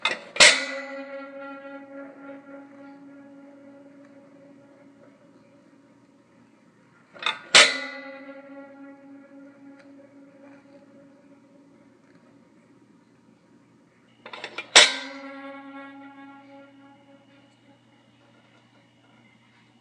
An impact followed by a vibration that slowly trails off.
This sound was made by twanging a dish in a dishwasher partly full of dishes.